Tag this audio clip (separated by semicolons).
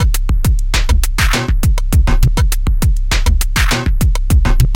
101bpm; beat; boss; cheap; distortion; drum; drum-loop; drums; engineering; eq10; equalizer; loop; machine; md2; Monday; mxr; operator; percussion-loop; PO-12; pocket; rhythm; teenage